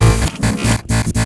robotic transform 2
Robotic transforming sfx for motion, tranformation scenes in your logo video or movie.
abstract, android, construct, cyber, droid, futuristic, glitch, grain, granular, machine, mechanical, motion, robot, robotic, sci-fi, sfx, sound, space, spaceship, trailer, transformation, transformer, wobble